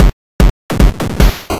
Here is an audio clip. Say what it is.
150bpm.PCMCore Chipbreak 3
Breakbeats HardPCM videogames' sounds
chiptune, cpu, hi, pcm, stuff, videogame, wellhellyeahman